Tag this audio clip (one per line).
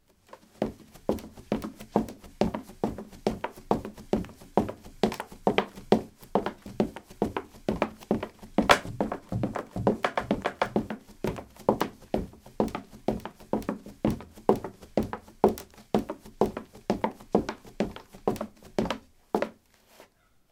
footstep
footsteps
run
running
step
steps